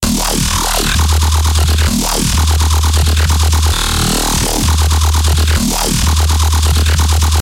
becop bass 4
Part of my becope track, small parts, unused parts, edited and unedited parts.
A bassline made in fl studio and serum.
Long and sloping grind with short popping 1/16th bass with alternate reversed talking bass
loops, low, Xin, wobble, sub, synth, dubstep, techno, bass, electronic, fl-Studio, electro, Djzin, loop, grind